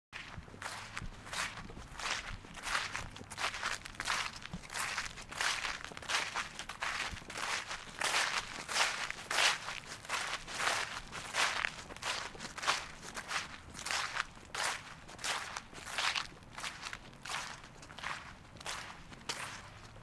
Walking in some leaves at Chautauqua Park in Boulder, CO. October 20, 2015.
fall, leaf, leaves, walking